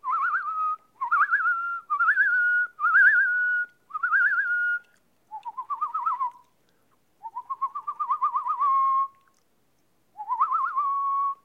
Bird Whistle SFX - all done with my vocals, no processing.